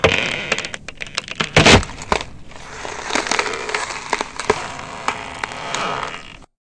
Ice 1 - Slow
Derived From a Wildtrack whilst recording some ambiences
BREAK, cold, crack, effect, field-recording, foot, footstep, freeze, frost, frozen, ice, snow, sound, step, walk, winter